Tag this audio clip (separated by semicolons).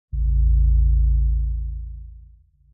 bass; low; sample; sub; supercollider; wobble